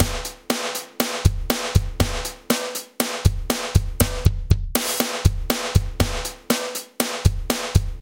Raw Power 006
Produced for music as main beat.
drum, industrial, loops, raw, rock